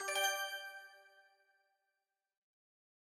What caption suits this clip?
magic game win success
A game success / win sound. Also good for magic type stuff.
cartoon, game, magic, success, win